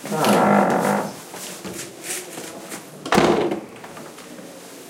20110801 03.wooden.door
wooden door opening and closing. San Juan de Puerta Nueva, Zamora, Spain. PCM M10 with internal mics
door, bang, field-recording